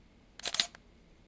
camera shutter
A camera taking a picture.
picture, shutter